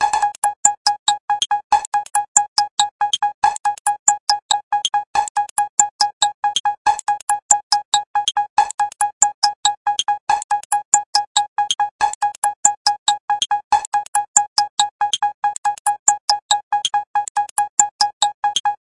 old navy glory
navy, glory, old